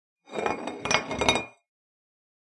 Rolling a Bottle
Rolling Bottle: glass on a table, slight impact, stops suddenly.
Table, Rolling, OWI, Bottle, Glass